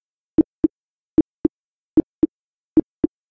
This click track was created with a decrease of the pitch. And levels were modulate.